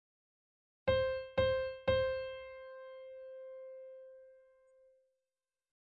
Do C Piano Sample
c, do, piano